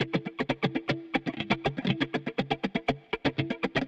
guit rithm
Rhythmic loop with my guitar. Logic
120-bpm, guitar, loop, rhythm